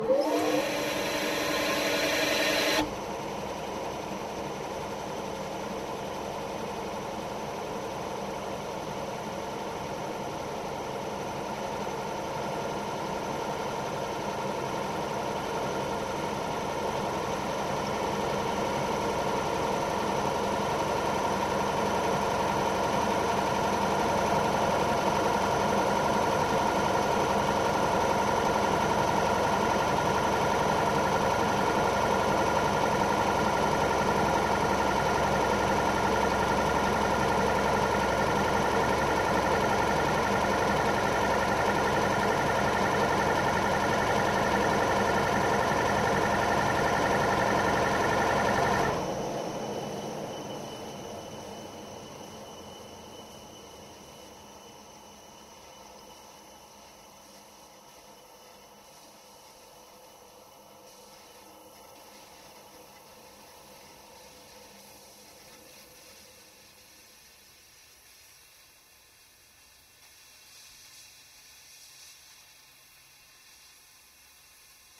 Air compressor - On run off
Full machine cycle of an unbranded air compressor turned on, running freely and turned off.
25bar, 80bpm, air, compressor, concrete-music, crafts, labor, metalwork, pneumatic-tools, pressure, tools, work